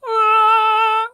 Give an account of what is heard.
I recorded some funny voices from friends for a job.
Grabé algunas voces graciosas con unos amigos para un trabajo.
GEAR: Cheap condenser mic/presonus tube.
EQUIPO: Micro de condensador barato/presonus tube.